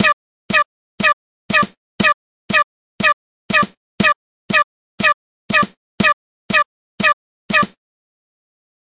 Sincopa baja 2
Diseño de sonido, ritmos experimentales.
abstract, rhytyhm